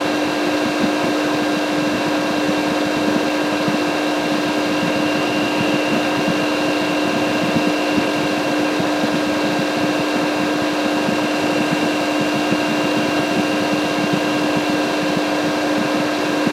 Water Pump. recorded with Zoom H1.
motor, industrial